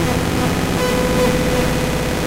Sci-Fi Alert 09
Science Fiction alert / error